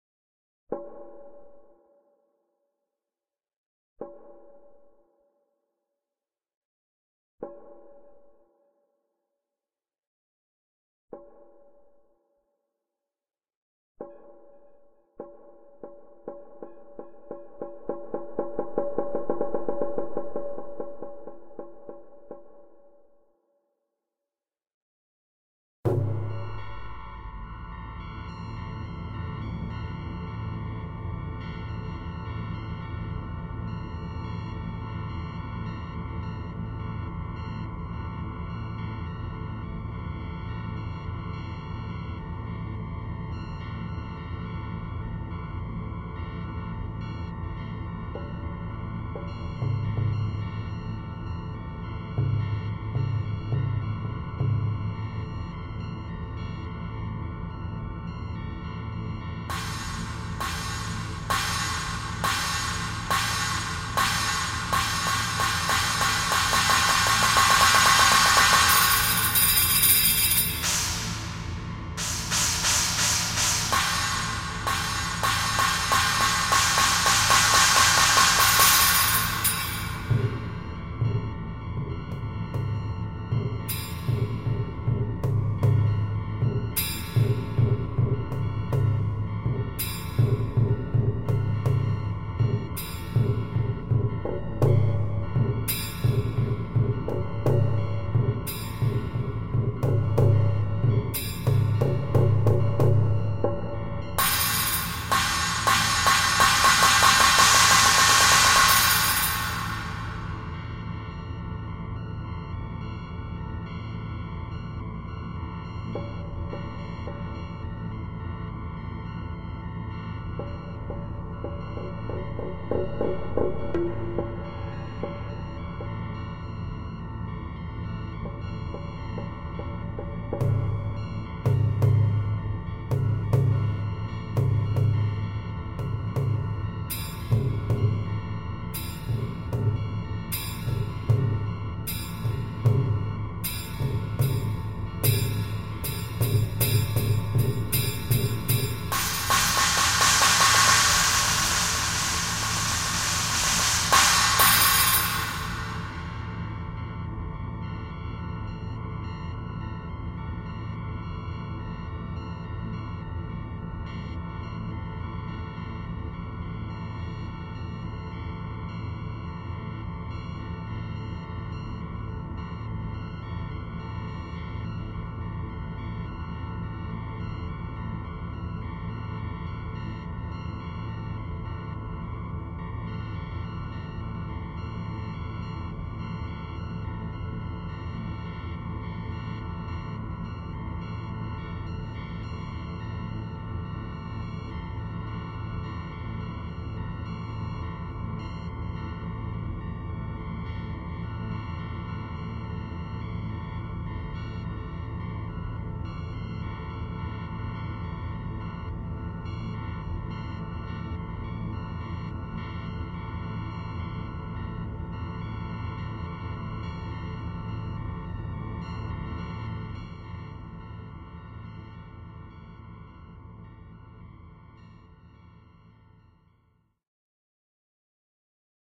20140221 nektar free daiko improv
Cross-ethnic-electro, Nektar-P6, Blue-synth, FL-Studio, Rob-Papen